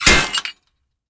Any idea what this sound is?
A metal trap being triggered sound to be used in fantasy games. Useful for all kinds of physical traps surprising victims.
epic, game, gamedev, gamedeveloping, gaming, indiedev, indiegamedev, metal, sfx, trap, video-game, videogames